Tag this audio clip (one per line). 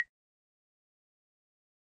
africa
instrument
percussion
phone